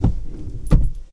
sons cotxe seient 2 2011-10-19
field-recording, car, sound